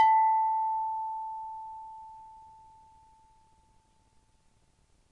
Soft strike on big and thin ceramic plate
Ceramic, Kitchen, Percussion, Plate